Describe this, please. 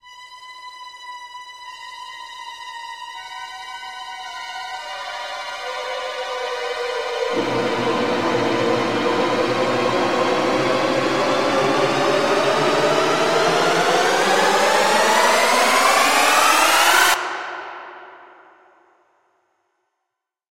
Horror movie strings
This sound effect was made with two tremolo string SoundFonts, pitch bend, and about 2 seconds of reverberation as sweetener. Not perfect, but passable. Use it in anything you like, but please give a little credit to the creator.
strings, orchestra, tremolo, film, movie, cinematic, horror, scary, creepy, glissando